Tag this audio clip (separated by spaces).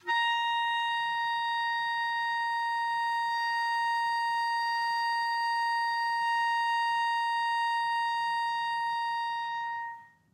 asharp5 long-sustain midi-note-82 midi-velocity-62 multisample single-note vsco-2 woodwinds